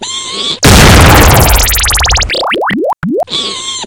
Squalk Bubble Bang
Similar to Bubble_Bang with the addition of 2 squalks. That's me literally squalking. Sounds also include hitting an empty paint can with a ruler and vocal noises all garbled up and played around with in Audacity.
Sound, Fill, Squalking, Bang, Bubbles, Effect